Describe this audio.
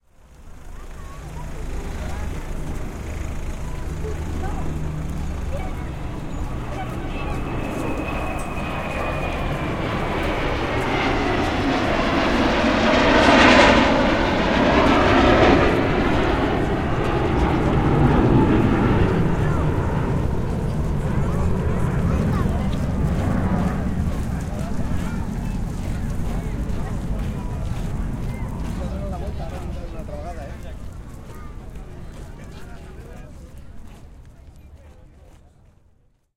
Aeroplane (on the street, with traffic and small crowd)
aeroplane, field-recording, transportation